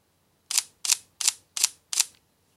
sound of shutter release in continuous mode, shorter exposure time.